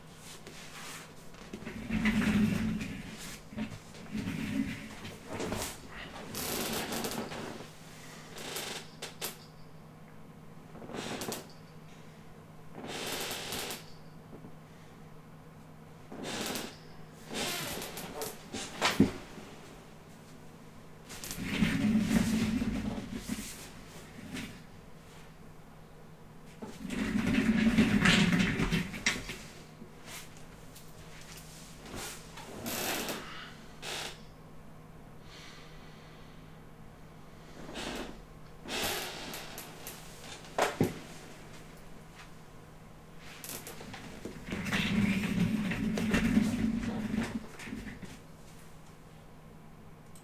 rolling office chair sitting standing up rolling again
Rolling office chair on tiles sitting in chair moving in chair squeeky chair rolling chair again.
furniture, Office, rolling, chair, squeeky, sitting